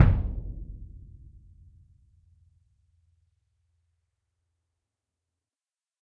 Symphonic Concert Bass Drum Vel32
Ludwig 40'' x 18'' suspended concert bass drum, recorded via overhead mics in multiple velocities.
bass, concert, drum, orchestral, symphonic